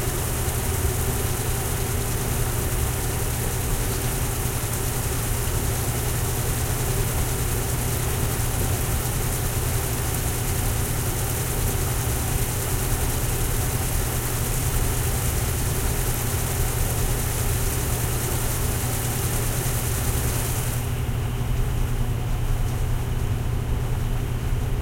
laundromat washers washing machines close rinse4
close, laundromat, machines, rinse, washers, washing